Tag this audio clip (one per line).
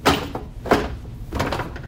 sitting
squeak
chair
squeaky
scrape
sit